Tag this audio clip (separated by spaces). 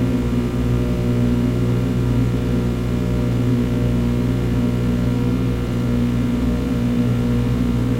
black buzz drone electrical h2 hum light noise zoom